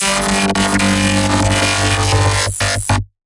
Glitchy Robot Scream - 6
Glitchy robot scream, made for a game with robot enemies.
I made it by using Audacity's "Import Raw Data" function to import random program files which creates all kinds of crazy noises. (All the sounds in this pack came from the files of an emulated PS2 game.) I then put a Vocoder on the sounds I found to make them sound even more robotic.
Glitch, Technology, Glitchy, Robot, Noise, Computer, Scream